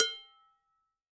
Stomping & playing on various pots
0, egoless, natural, playing, pot, rhytm, sounds, stomps, various, vol